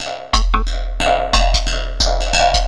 Done with Redrum in Reason
percussion, electronic, reason, wavedrum, redrum